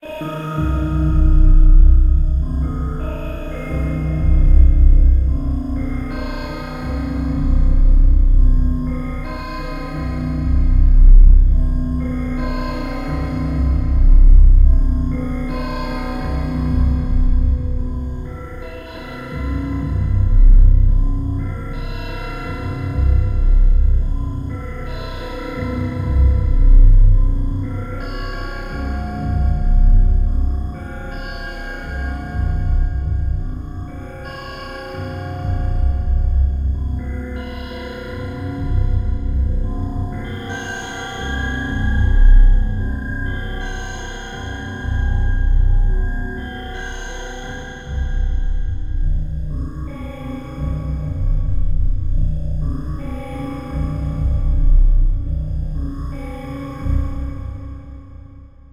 HV-HighVoltage
Inside a huge power station.
Made with Nlog PolySynth and B-step sequencer, recorded with Audio HiJack, edited with WavePad, all on a Mac Pro.